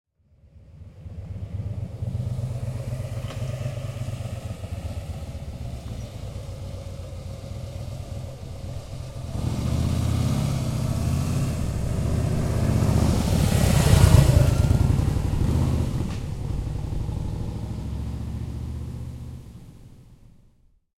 HARLEY DAVIDSON - 2
Sound of Harley Davidson on a road in South of France. Sound recorded with a ZOOM H4N Pro and a Rycote Mini Wind Screen.
Son de Harley Davidson sur une route du sud de la France (Vaucluse). Son enregistré avec un ZOOM H4N Pro et une bonnette Rycote Mini Wind Screen.